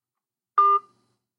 cell phone hang up
The sound of hanging up from your cell phone
cell, hanging, phone, up